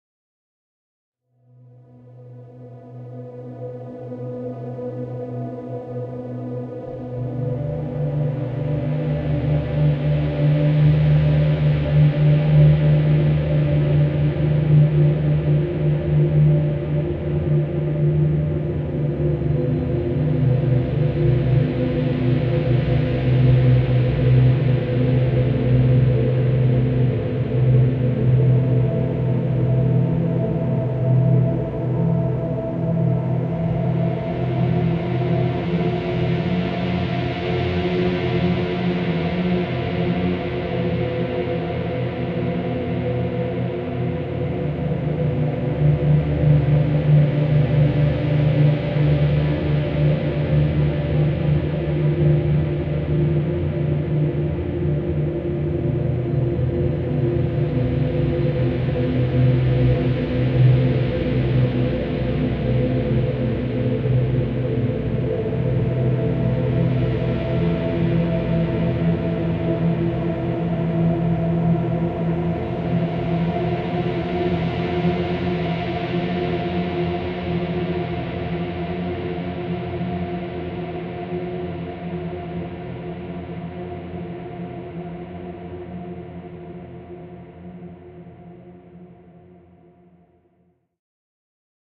Creepy Horror Ambient - Truth
Some synth action
ambiant, ambient, creepy, film, free, high, horror, movie, quality, scary, suspense